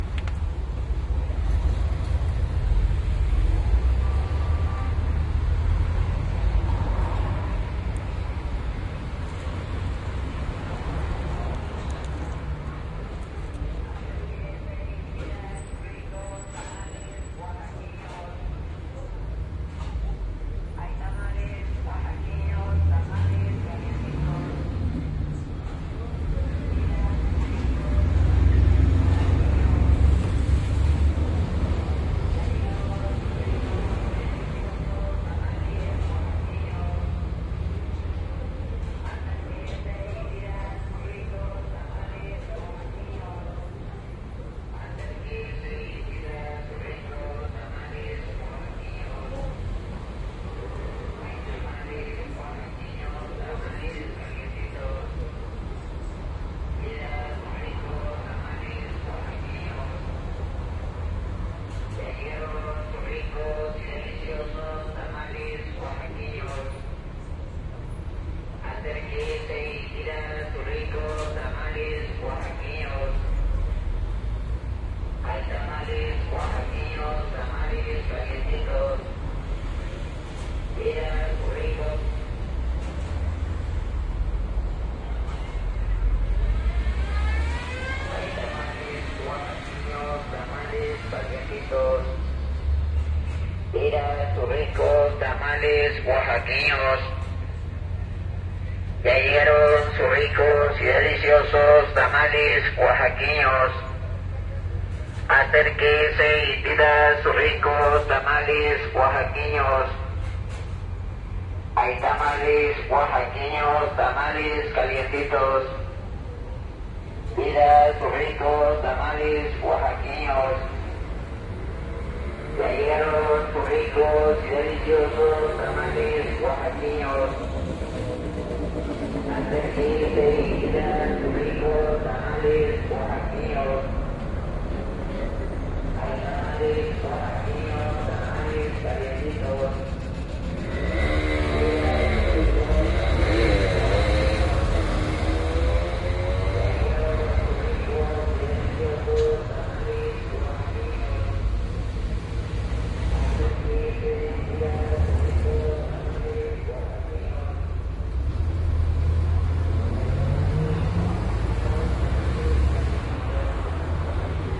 ricos-tamales
Recording with Soundman OKM Microphones and to Canon HV20 Camcorder. Recorderd from balcony in "Calle General Prim", Colonia Jurez, Mexico City. Below the balcony is a taco restaurant, on the other side of the street a parking lot. The guy with his meditative anouncements is selling "Tamales" from a bicycle and has this very typical recording which invites you to get closer and buy his delicious & hot tamales in Oaxaca style. I tried them, they're good.
ambulantes, bocho, calientitos, df, districto-federal, mexico, microbus, pesero, ricos, street, tamales